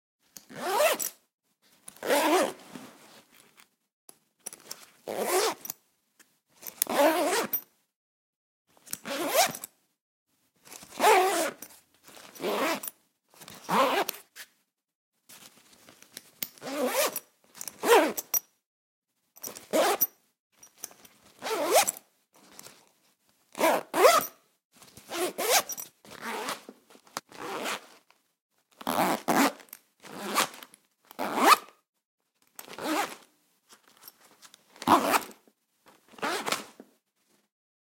Zipping and unzipping various zipper lengths on a nylon backpack at various speeds and intensities.
unzip, short, bag, nylon, long, zipper, messenger, backpack, zip